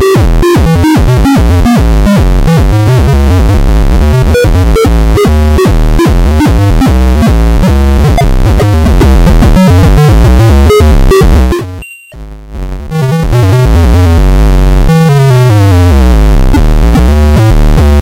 Tfdbk-016sq-harsh
Another circuit-bent style sound. This one contains rhythmic, quasi-rhythmic and chaotic sections. Mostly low frequency beep sounds.
Created with a feedback loop in Ableton Live.
The pack description contains the explanation of how the sounds where created.